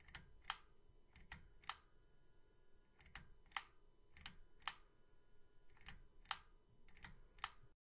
pulling fan light switch